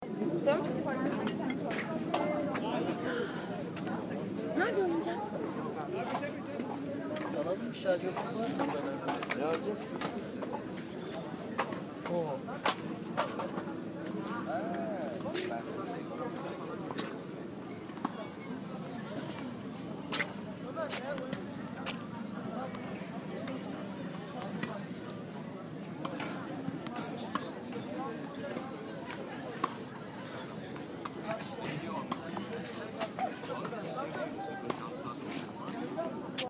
Park Istanbul
environment sound of a park in Istanbul, Turkey
environment, field-recording, Istanbul, Park